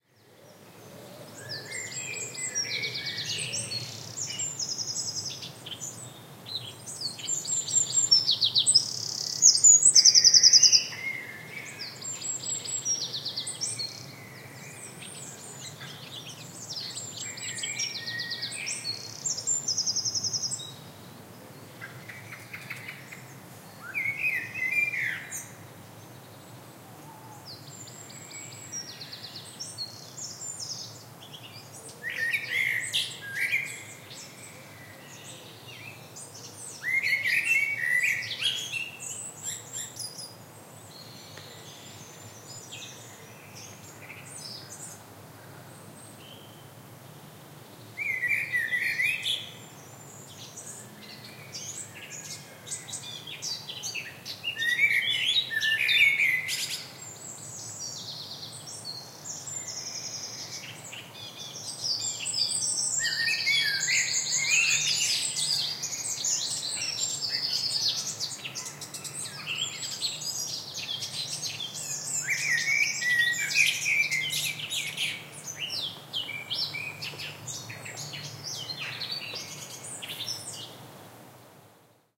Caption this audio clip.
Gloucestershire wood in late April. Mid-morning. Birdsong. Blackbird and others